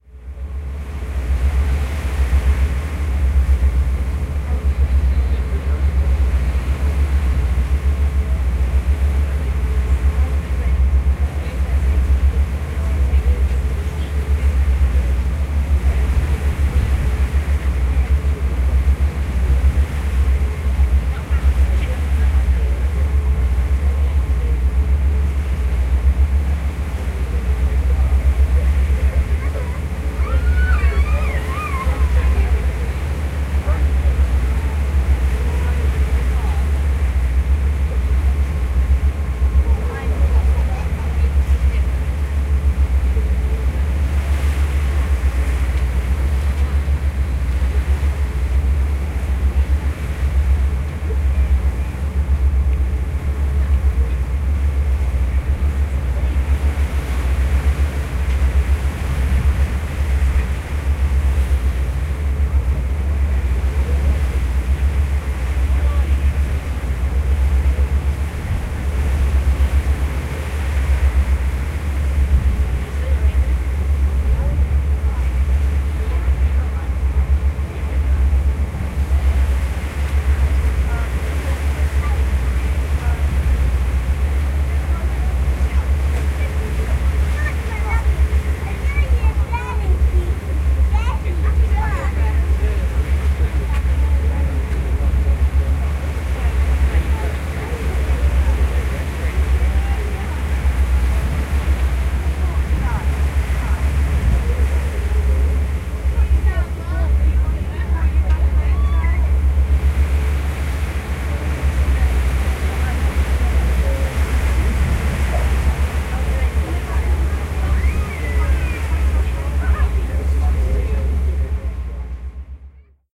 Ferry II
Deck of a small ferry, diesel engines and hissing bow wave. II has more passenger chatter than I.
boat, diesel, engine, ferry, field-recording, ocean, sea, ship